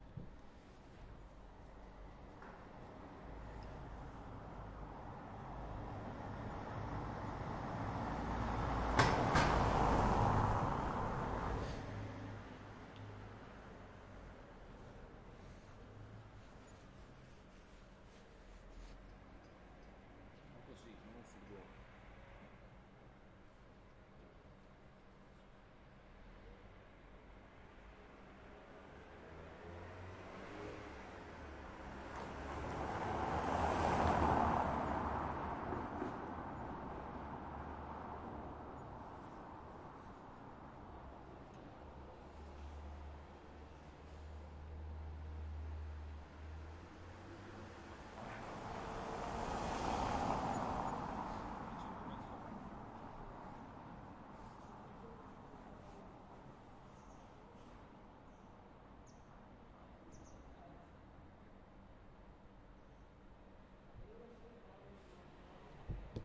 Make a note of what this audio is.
Crossing car, paved road
driving, automobile, road, car, vehicle